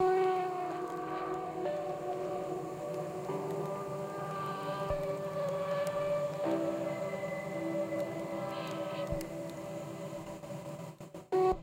Melancholia Tape Loop
The recording was made on tape. Sony TCM 200-DV recorder. After recording, I decided to go outside and record what happened to a friend on the Zoom h1n recorder, but the creaking from a freight train intruded into the recording.
sad, vintage, depressive